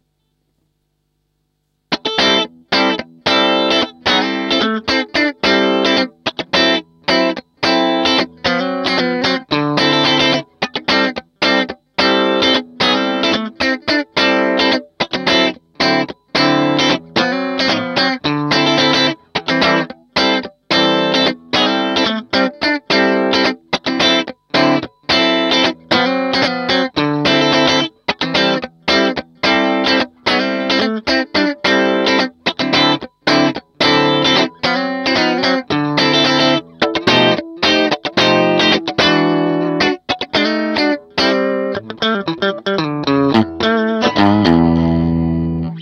Funk Tele Am@110 bpm
Funky rhythm part played on Telecaster at 110 bpm. Am7-D9 x 4 and turnaround as F9-E9-Am7-E7.
guitar, loop, telecaster, funk